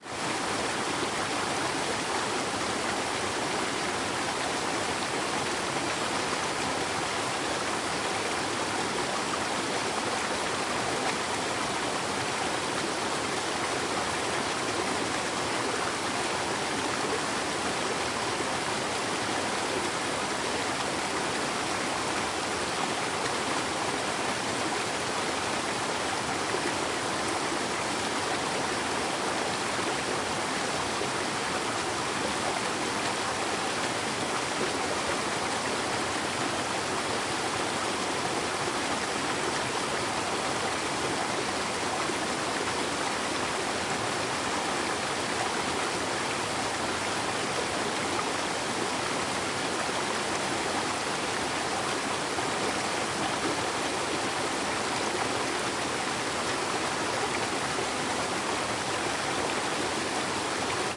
20110714 Skywalk river
Recording of a river mid way though a rainforest walk.
Equipment: Zoom H2
Recorded at the Tamborine Rainforest Skywalk, Australia. July 14, 2011
australia, bush-walk, field-recording, forest, rainforest, river, water, wind